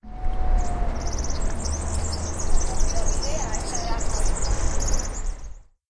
Gafarro Ariana i Mishal
Vam trobar un Gafarro possat en un arbre al parc de la Solidaritat al costat del tobogan gran